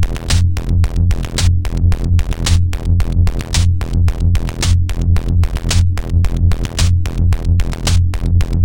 Distorted, broken, analogue loop, monotribe [111 BPM]
Dug up from an old project.
Korg Monotribe groovebox processed by a Doepfer A-189-1 Bit Modifier, a lowpass- and a highpass-filter.
Recorded using NI Maschine.
11.10.2013
It's always nice to hear what projects you use these sounds for.